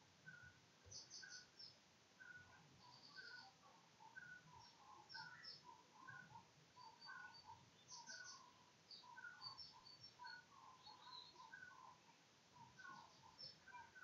bird chorus ambiance
When life gives you lemons, make lemonade. Don't worry, in the end they settled down and I was able to get the recording.
morning, tweet, bird, chirp, birds, nature